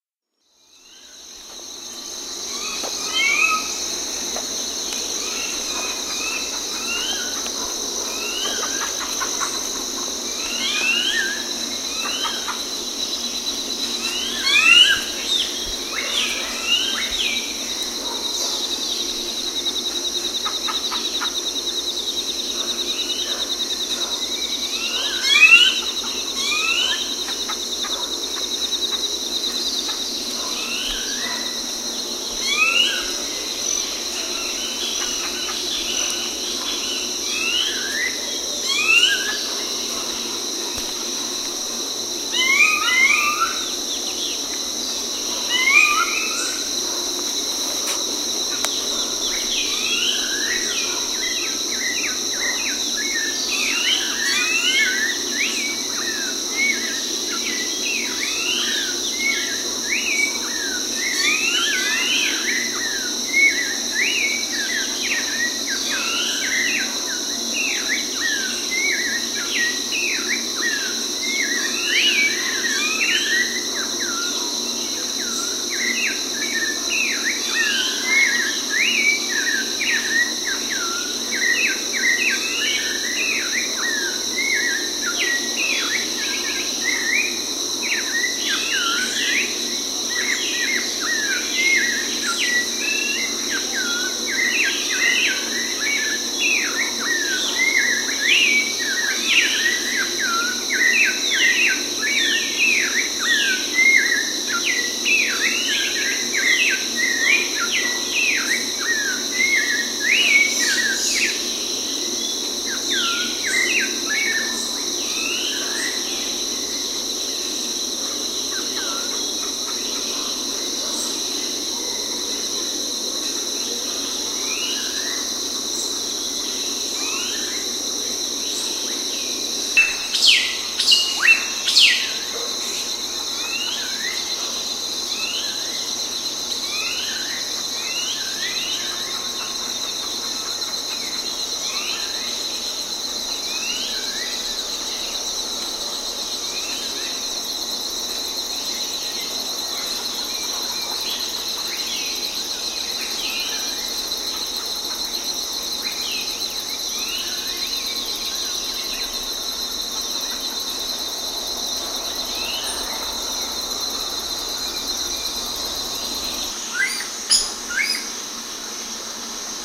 morning birds
Different birdsongs and calls in the garden of our Hotel in Uxmal, Yucatan, Mexico at 6 am. In the background 24 hours choir of cicada and crickets. I cut low frequences to get rid of the noisy generators in the background.Sony Dat-recorder, Vivanco EM35.
ambient, bird, birdsong, field-recording, rainforest, tropical